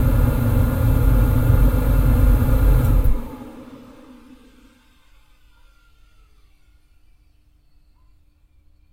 Oil burner shutdown
Shutdown of an oil burner used in central heating systems.